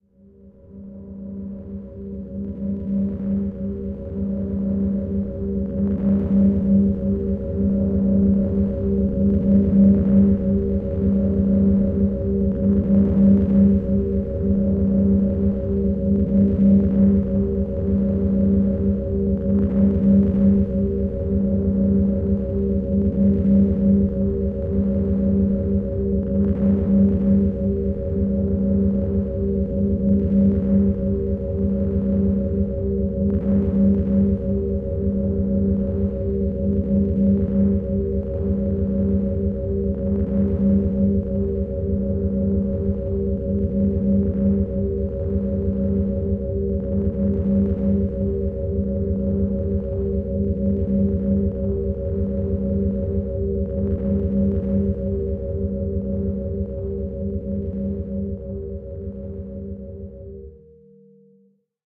space sound made in fl studio. it is like when a machine working:)
ambience, ambient, atmosphere, dark, deep, digital, drone, electronic, experimental, fx, horror, noise, pad, reverb, sample, sound-effect, space